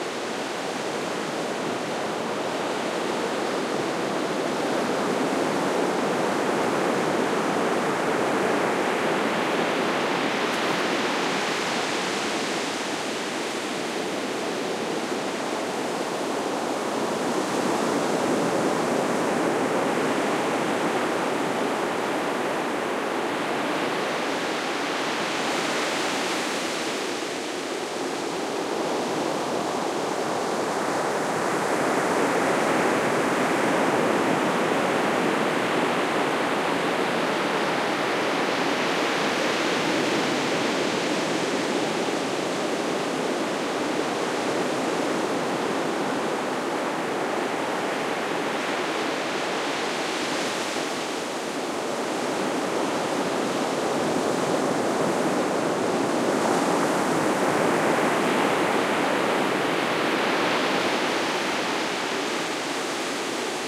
waves breaking on a sandy beach. Recorded at Playa de Matalascanas (Huelva, S Spain) using Shure WL183, Fel preamp, PCM M10 recorded
beach, field-recording, loop, surf, waves, ocean, breaker